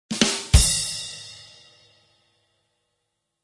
Joke drum fill 04
A short drum fill to mark when a good point has been landed in a joke. Each with a different variation.
Recorded with FL Studio 9,7 beta 10.
Drums by: Toontrack EZDrummer.
Expansion used: "Drumkit from hell".
Mastering: Maximus
Variation 4 of 10
comedy, crowd, drumkit-from-hell, drums, ezdrummer, fills, humor, jokes, laughters